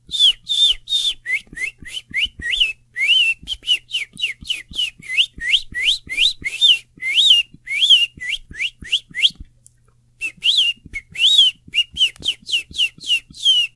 Just a man whistling like a bird.